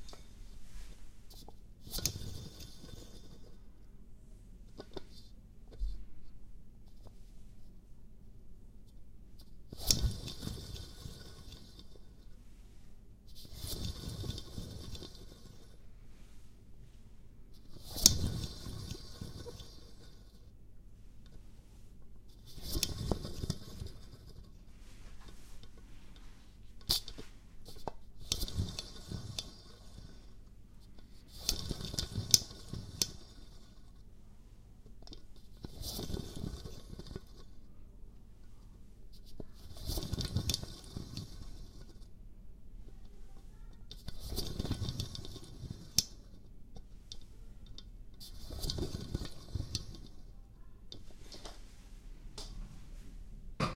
Rolling Globe
globe, house